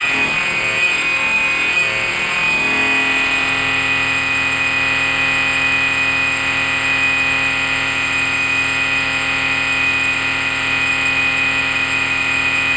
Binaural Ringmod Texture from Reason Subtractor and Thor Synths mixed in Logic. 37 samples, in minor 3rds, C-1 to C8, looped in Redmatica's Keymap. Sample root notes embedded in sample data.
Binaural, Multisample, Synth, Ringmod, Texture